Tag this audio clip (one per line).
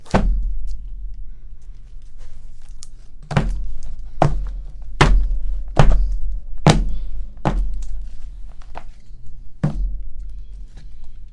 foots
walk
slow